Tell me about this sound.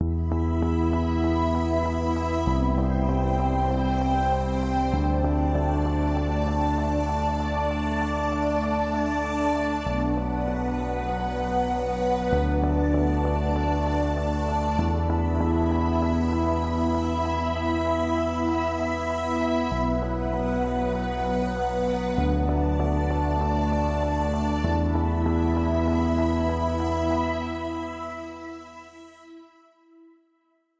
pad mixdown2
Created simple pad mix with my music production software.
pad, pad-mix, ambient, pad-electronic, smooth, soundscape, synth